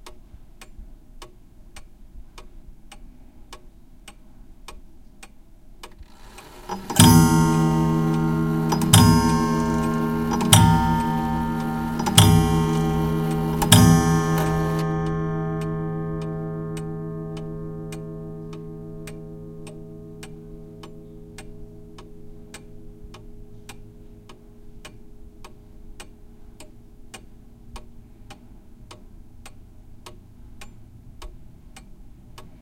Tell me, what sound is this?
grandfather clock / reloj de pared
bell, clock, house, percussion